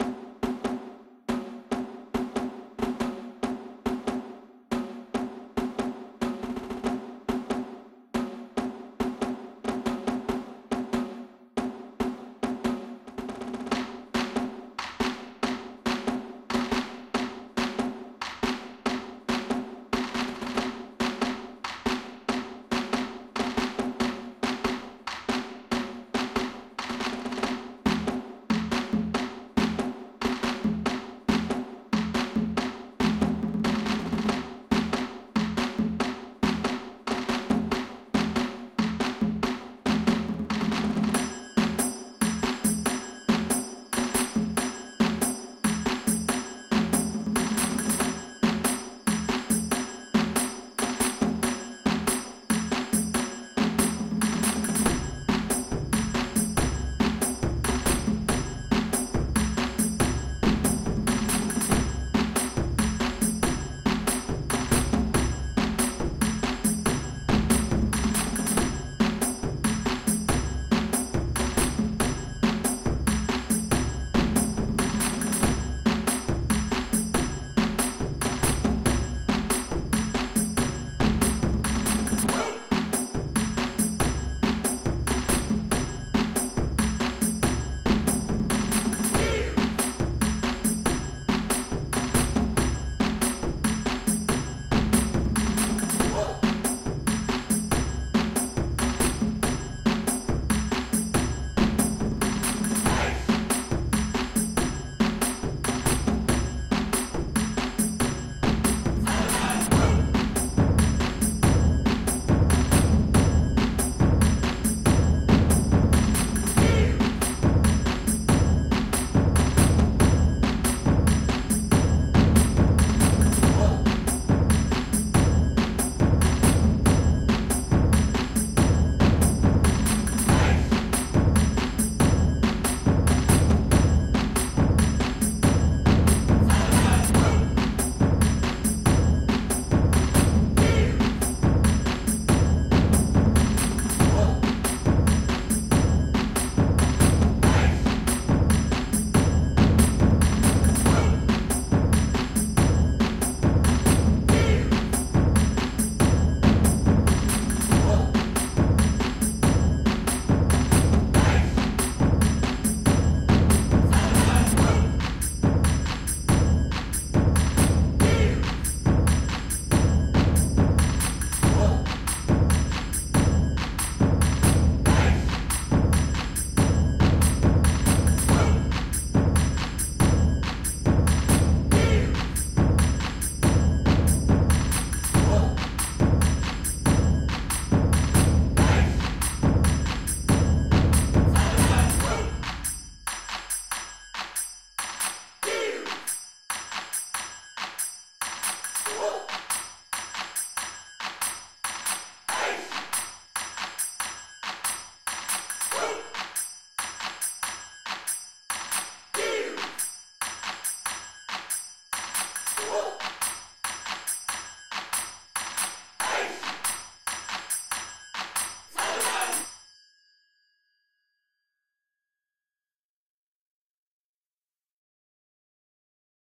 A lot of Taiko drums and shouts arranged into one decent loop.
drums, japan, taiko